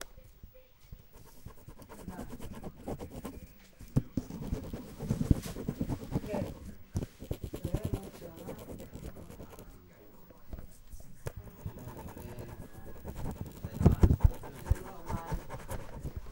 paper on paper
paper, air, water